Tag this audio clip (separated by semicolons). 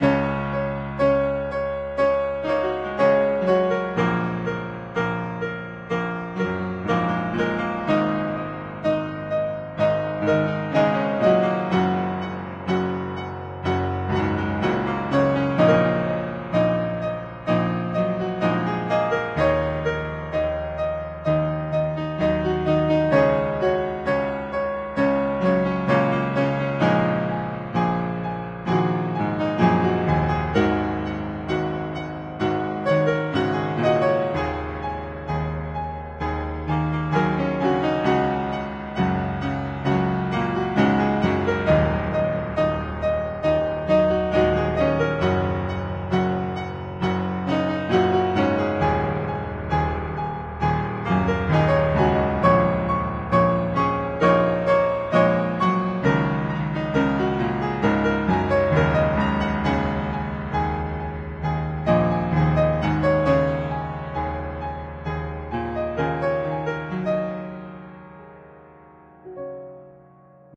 F; heroic; improvised; movie; pentatonic; song; tension; theme